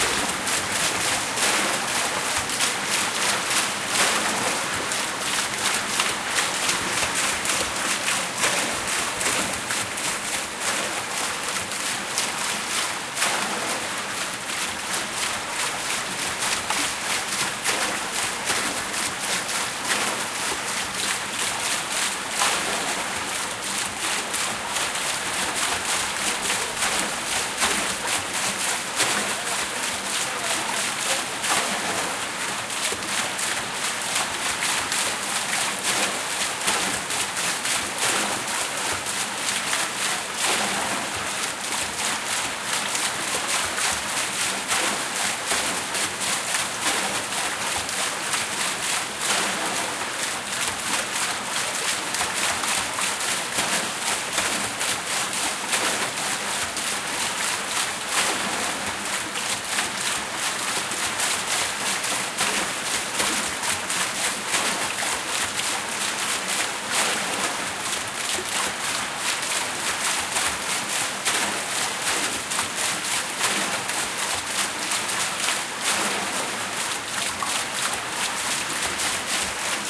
Recording of a running watermill, water running into a wooden gutter and a watermill wheel turning, creating a rhythm, or a percussive loop. Ambience. Recorded by Zoom H4n and normalized.